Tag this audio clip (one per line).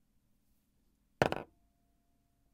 marker
wooden
desk